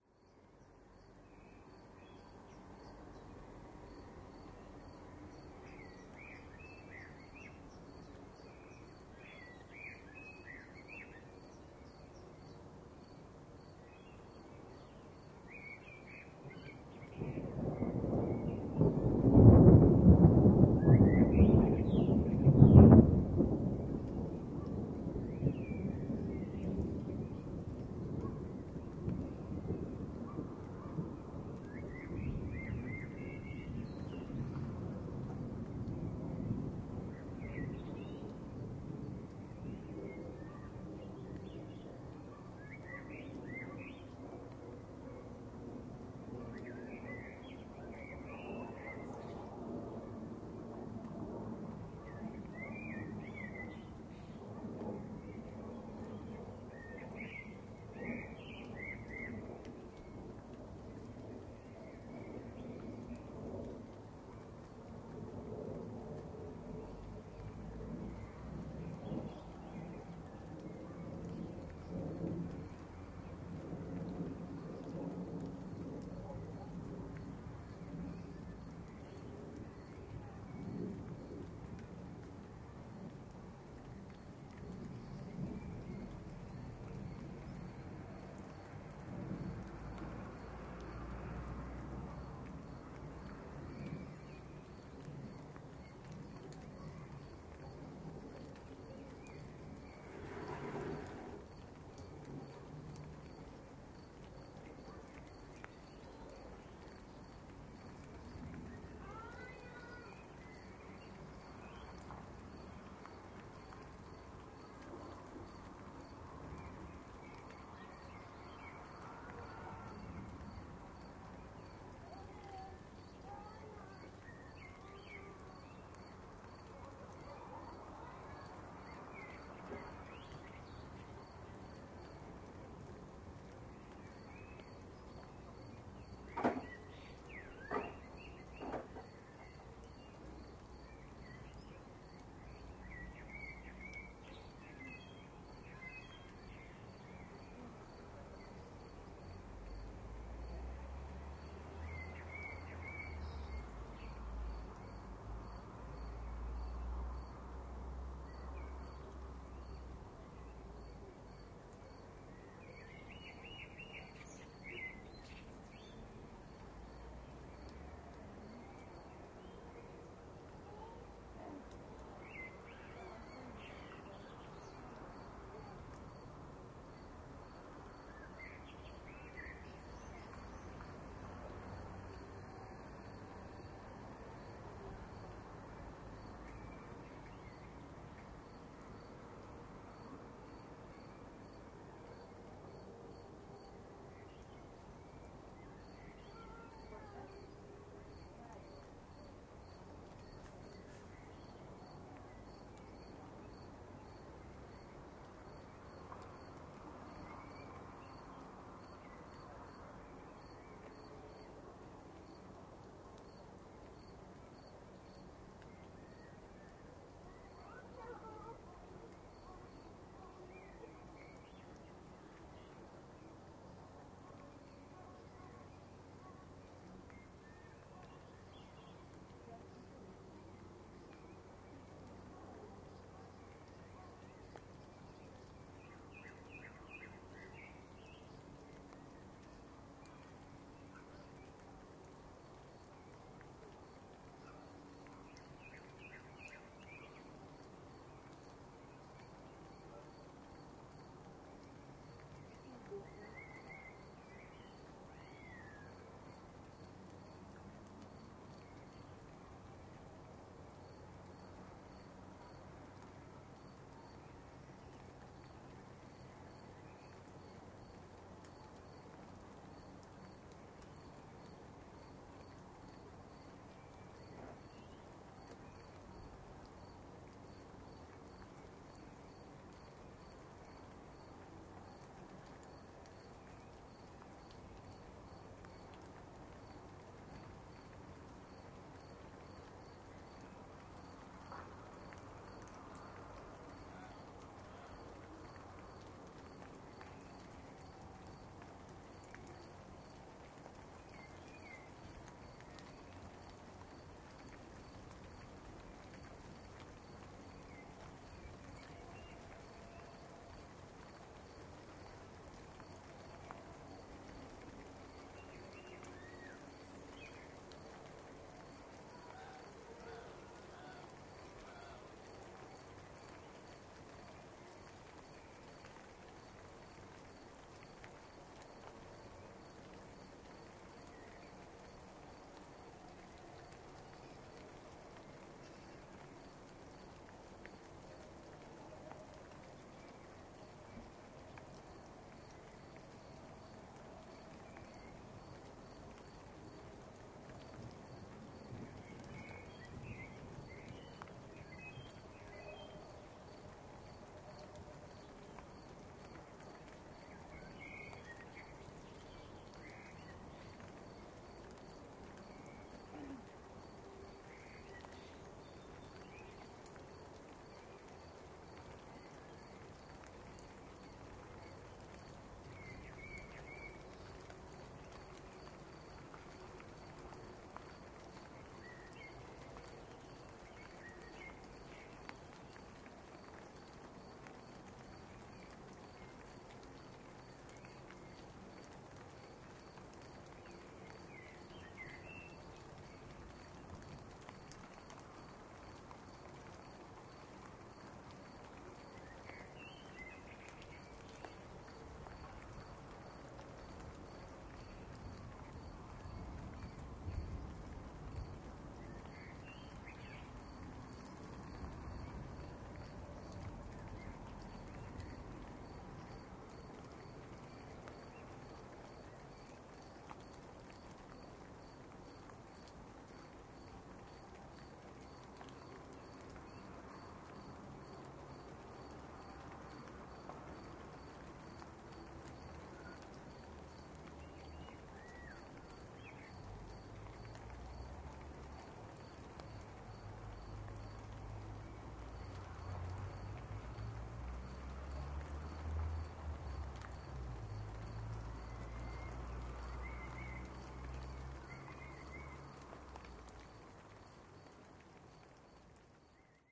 10th of June 2013 thunderstorm over Pécel part 2
Severe thunderstorm passed Pécel on 10th of June 2013. Recorded by SONY STEREO DICTAPHONE.